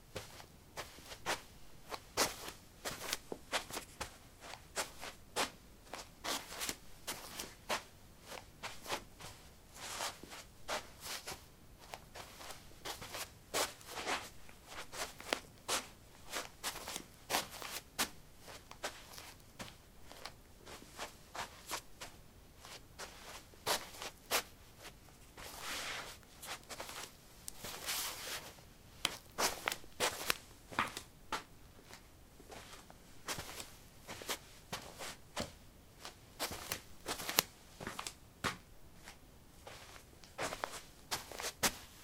carpet 08b womanshoes shuffle doormat
Shuffling on carpet: woman's shoes. Recorded with a ZOOM H2 in a basement of a house, normalized with Audacity.